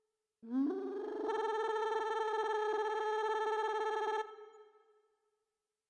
A pitched down slow scream